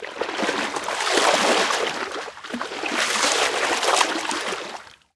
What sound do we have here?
Water slosh spashing-1
environmental-sounds-research, water, splash